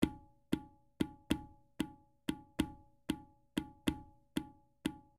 Jungle drum simple
Created with Music Forge Project Library
Exported from FL Studio 11 (Fruity Edition)
Library:
Patcher>Theme>Jungle>Drum>Simple
MFP, Simple, Theme, Scores, Extra, Patcher, Jungle, Music-Forge-Project, Drum